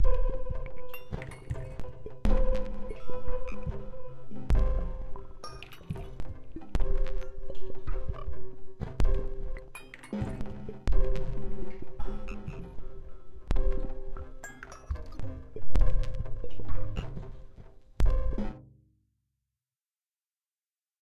doggy glitch45
lowercase minimalism quiet sounds
lowercase, quiet, minimalism